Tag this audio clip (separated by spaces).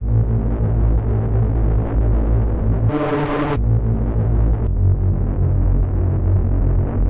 parts remix